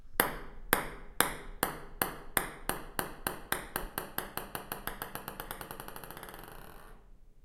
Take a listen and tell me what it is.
Dropping ping pong ball on table 2
Dropping a ping pong ball on a ping pong table.
ball, foley, percussive, pingpong, table